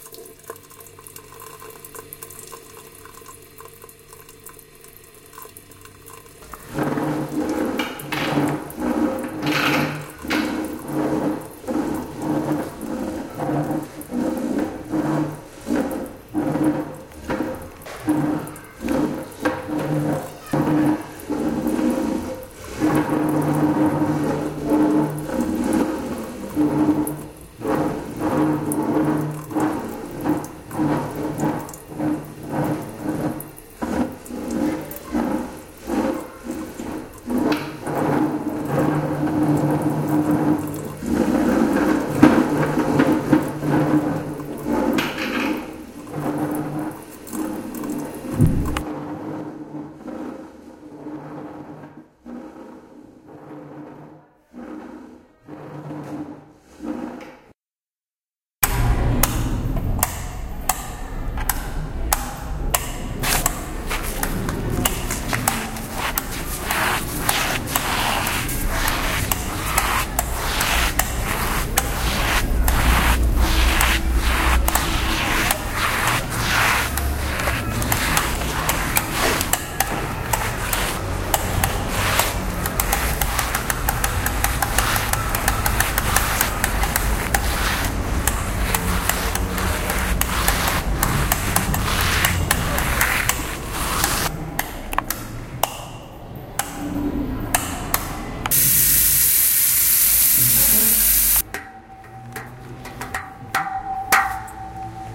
Sonic Postcard AMSP Jiabo Andrés
SonicPostcard, CityRings, AusiasMarch, Barcelona, Spain